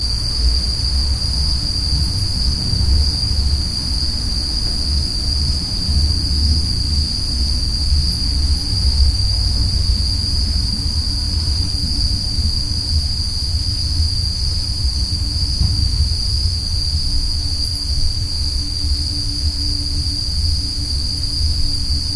Underground world
hell, nightmare, horror, fearful